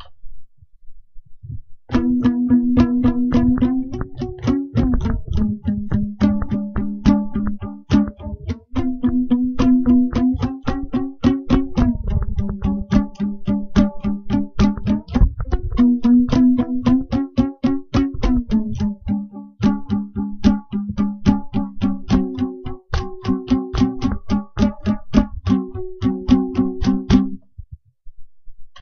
lacky box2
Recorded from a little 'lacky box' I made from a cardboard box and a few elastic bands.
riff, elastic